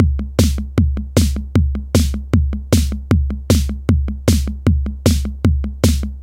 TR-606 (Modified) - Series 1 - Beat 08
Beats recorded from my modified Roland TR-606 analog drummachine